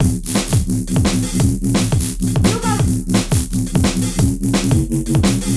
insane mary jane bass loop 2
Lifted drum and bass loop created with Ableton, Massive and Wavsamples.
Bass, Bassline, Bassloop, Drum-and-Bass, Drumloop, Drums, Dubstep, Electro, Electronica, Loop, Thor